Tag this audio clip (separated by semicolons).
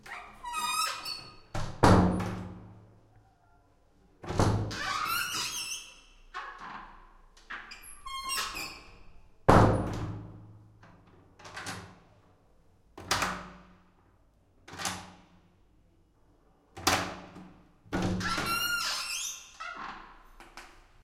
bathroom; close; deadbolt; door; handle; open; restaurant